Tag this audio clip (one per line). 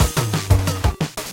modified
drum
loop
707